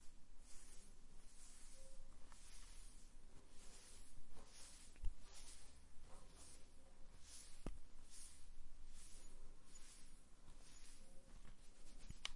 Combing wet hair, comb
Martina in bathroom combing her wet hair with a comb.
Part of Martina's Evening Routine pack.
Recorded with TASCAM DR-05
Signed 16 bit PCM
2 channels
You're welcome.
bathroom, comb, combing, evening, female, hair, hair-brush, high-quality, HQ, hygiene, wet-hair, woman